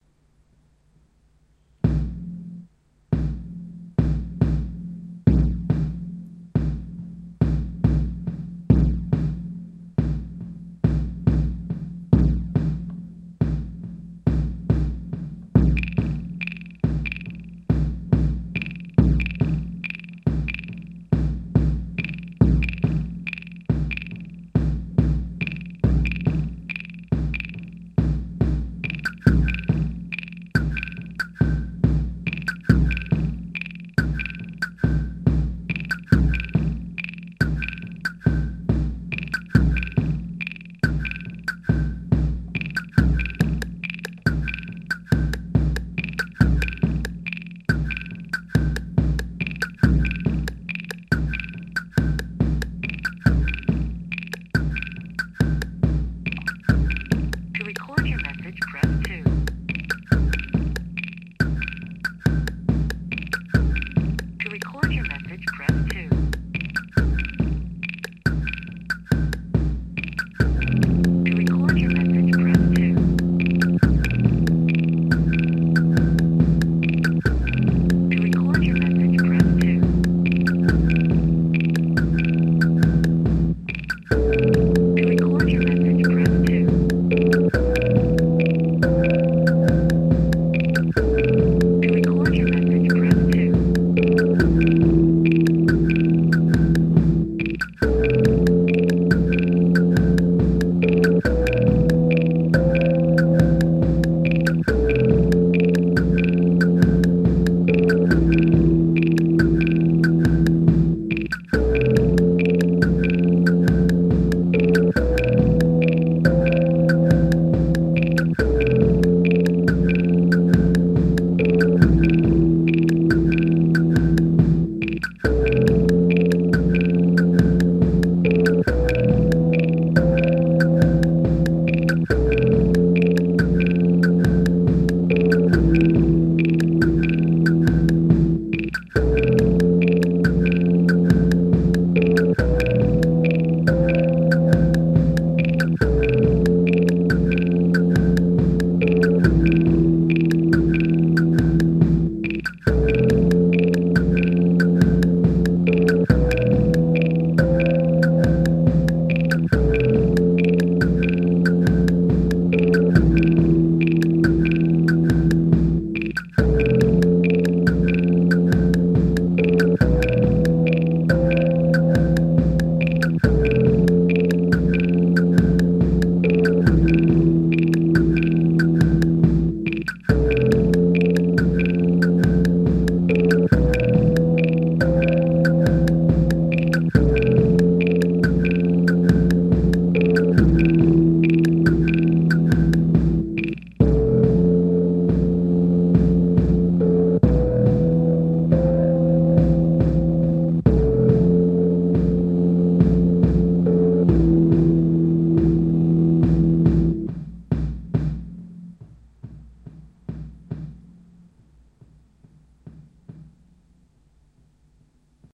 improvised piece on Electribe SX1